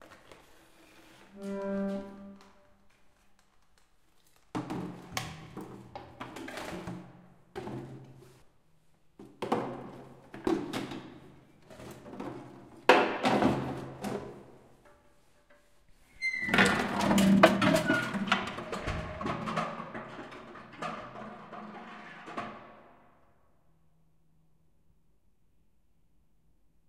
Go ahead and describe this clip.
Sound of chute using on 8 floor.
Recorded: 2013-11-19
XY-stereo.
Recorder: Tascam DR-40
chute, city, rumble